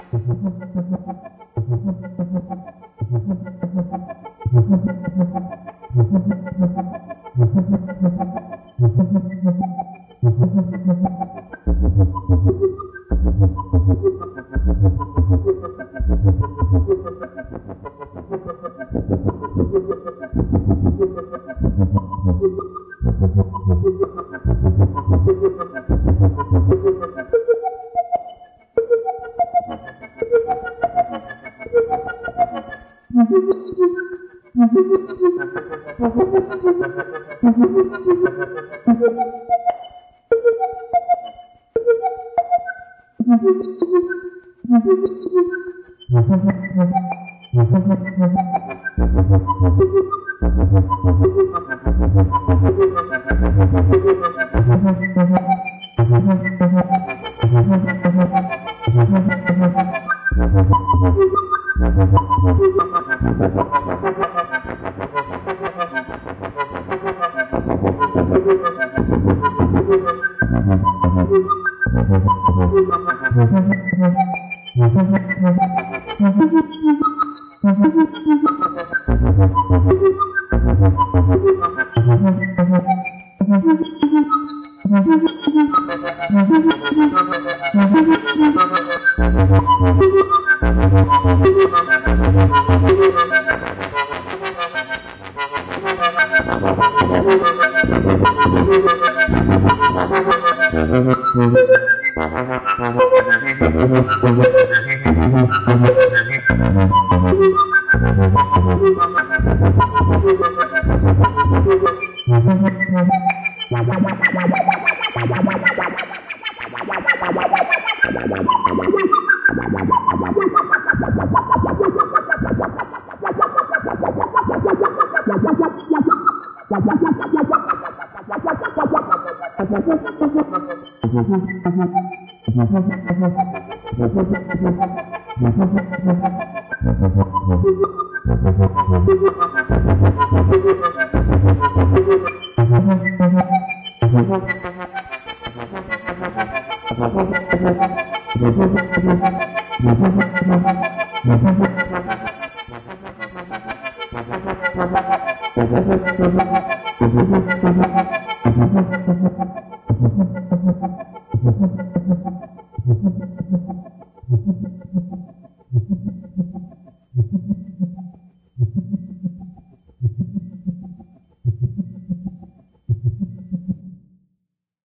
I don't remember how I set it up but it was wierd. Two VCOs and a moog filter clone being sequenced while the filter was modulated by a LFO and AR env. generator. I triggered the AR with a gate sync x2 ..or something.
vclfo, analog, sequencer, vco, vcar, synthesizer, vcf, modular